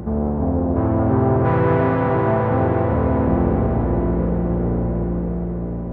A dark, slow arpeggio played on a Nord Modular synth.
ambient, arp, dark, digital, loop, quiet